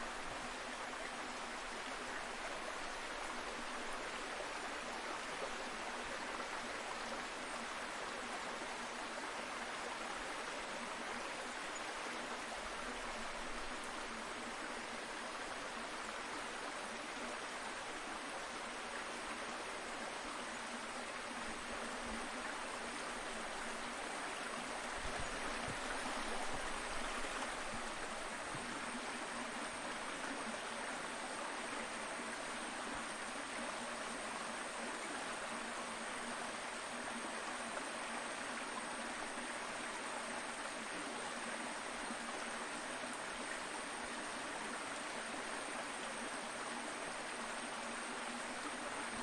Jungle Creek Choco-Colombia
Creek recorded at Choco, Colombia
bird, forest, field-recording, nature, water, creek, ambient, birds, jungle